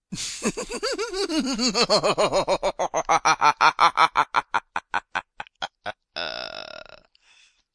Evil Laugh 5
cackle, demented, evil, halloween, laugh, maniacal